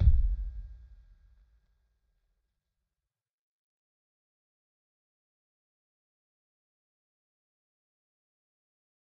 Kick Of God Bed 021
drum, god, home, kick, kit, pack, record, trash